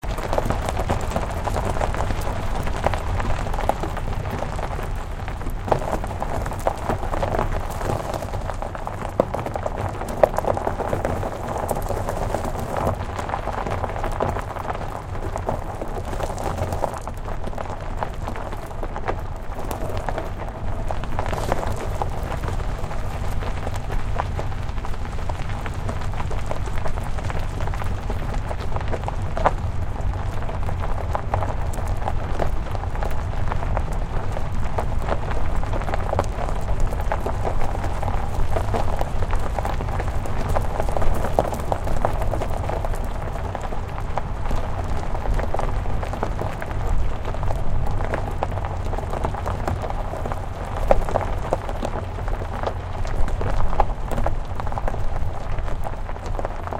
SFX Gravel Road
Driving slowly on a gravel or dirt road
crunch, pop, gravel